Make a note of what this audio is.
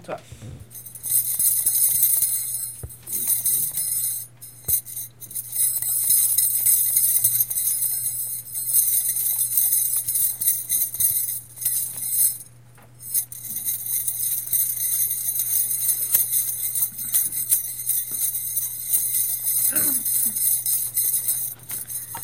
Lots of lovely gold bracelets and shaken up down on someone's wrists.